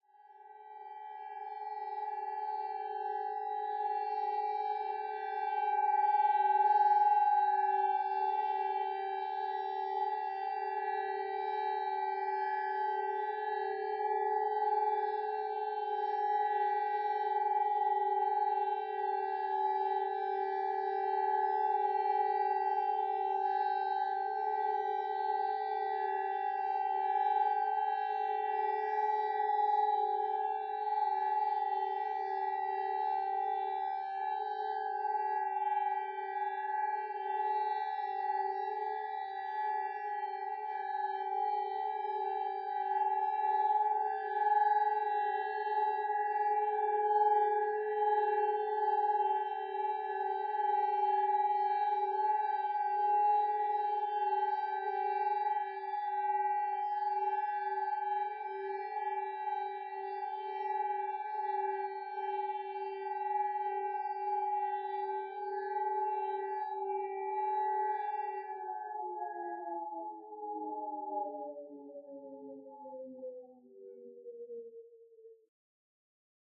Anti Plane Siren
Rehearsal of Sirens I recorded from my window in Prague. After that I separated the harmonics for clean sound. Recorded using Zoom h2n on X/Y mode.